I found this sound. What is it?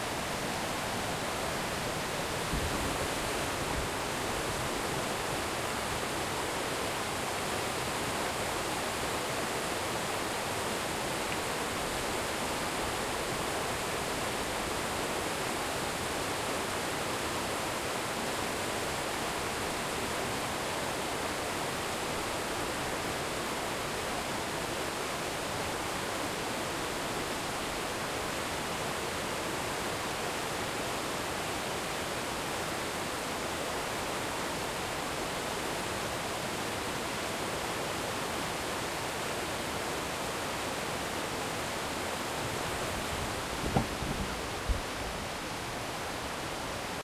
A sound clip of a river at Mount Rainier in Washington. Captured with a Tascam DR-40.
Rushing-Water, River, Water